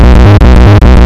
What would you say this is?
ThrashFuckingShit Guitar1 hearted
Synthesized in Audacity, doesn't even sound like a guitar but what the heck.
loop, noise